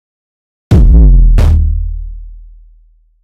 HK rekt doubletapB
I made this in max/mxp.
kick-drum, bass-drum, kickdrum, bass, B, drum, percussion, saw, distortion, kick, noise, oneshot, overdriven, distorted